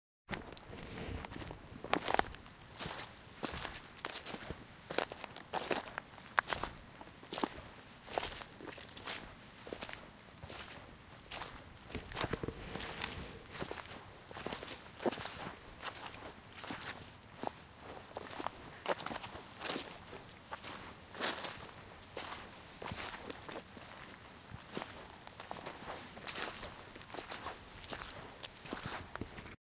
walking through wet forest
floor, footsteps, forest, ground, leaves, step, twigs, walking, wet, wood